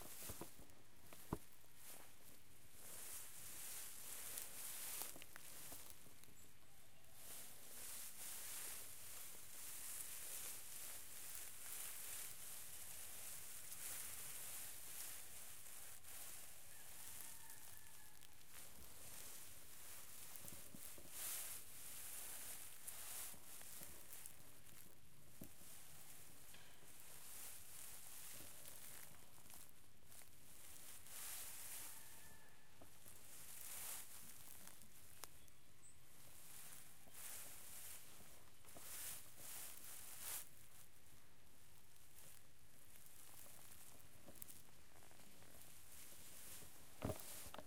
tall grass rustling
moving my hand through tall grass to see if pokemon appeared. recorded at Peña de Lobos, Mexico.
It helps this community a lot :)
tall, rustling, rustle, grass, bush, foliage